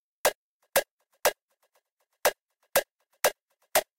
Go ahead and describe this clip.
MOV.Beat2
Computer beat Logic
noise, 120-bpm